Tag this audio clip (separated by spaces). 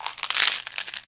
bottle one-shot shake noise pills